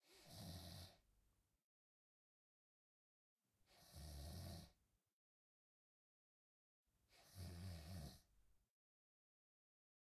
snoring.
AudioTechnica AT3035, Zoom H4n
male, snoring